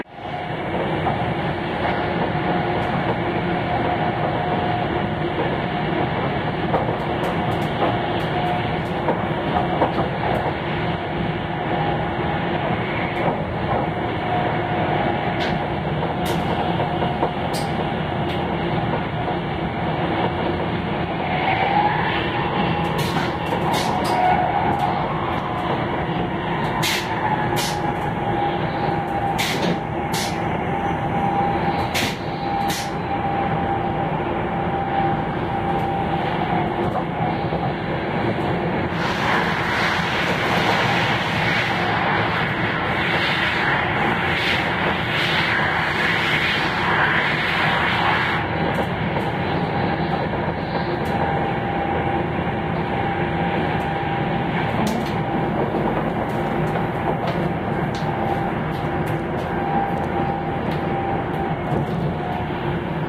bullet train
Inside cabin train journey - rate if you like - enjoy :)
bullet, clatter, electric-train, express, fast, field-recording, locomotive, noise, passenger-train, rail, rail-road, railroad, rail-way, railway, riding, sound, speed, tracks, train, vibrations, wheels, wind